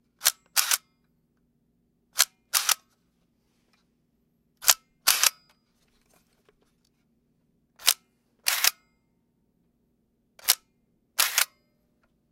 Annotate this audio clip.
Taking a Picture with a Nikon Camera
Picture, Camera, Photo, Flash, Take, 1980s, Analog